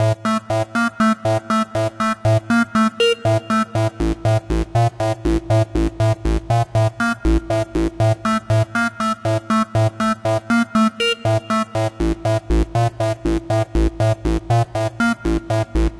The Forge Bass Line (4/4 120bpm)
This is a bass line from a recent song I made. It is loopable at 4/4 120bpm and follows a strict quantization.